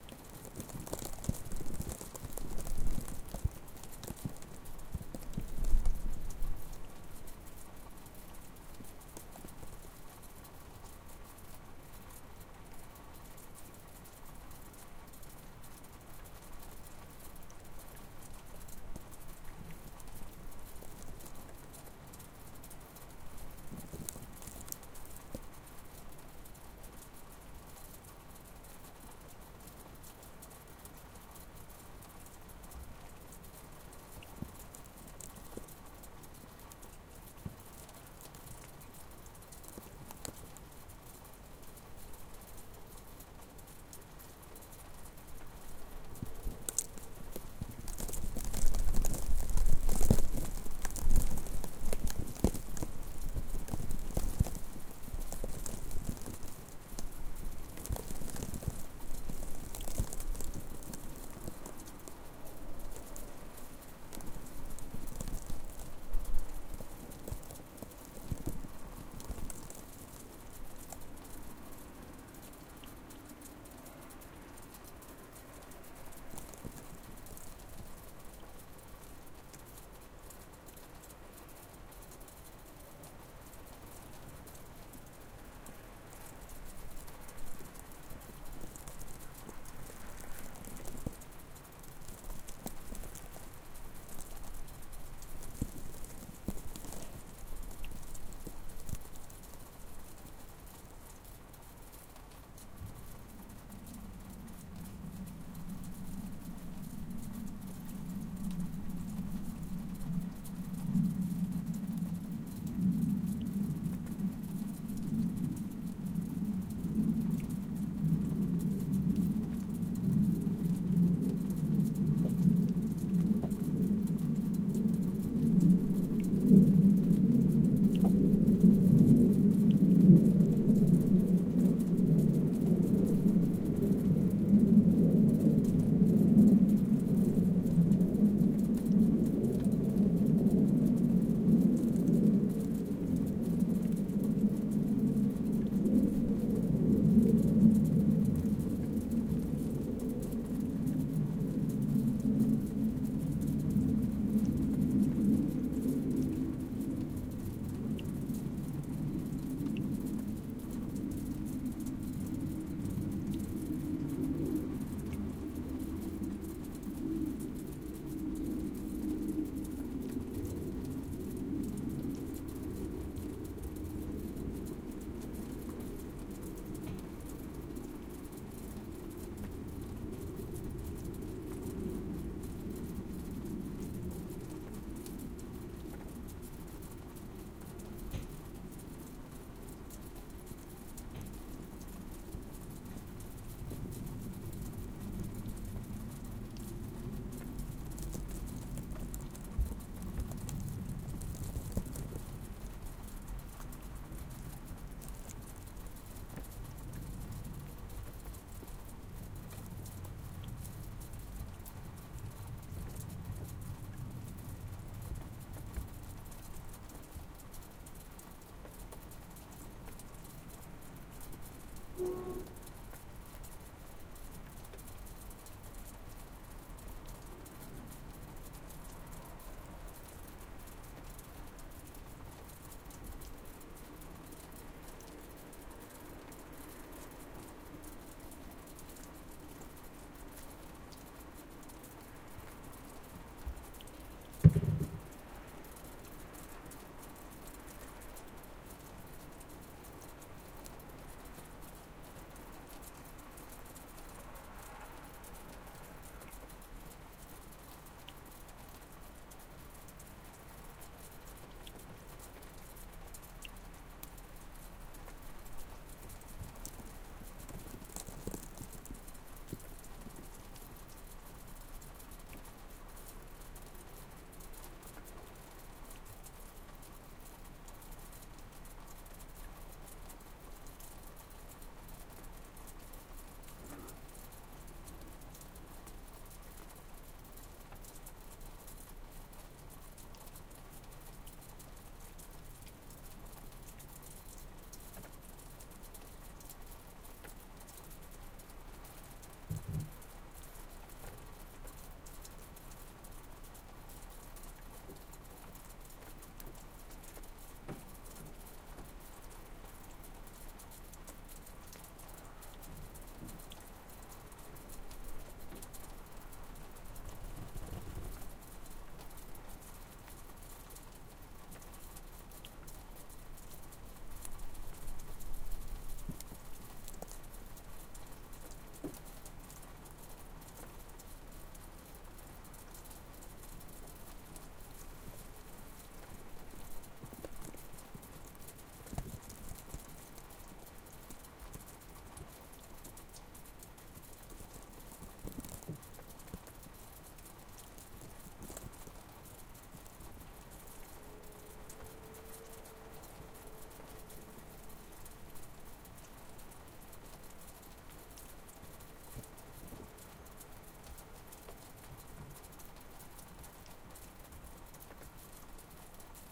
A wet snowfall, recorded near a house wall. Water dripping from the roof.
Recorded on a Zoom H6, with XY mic (electret) at 90 degrees, covered in faux fur and with a plastic bag around the recorder.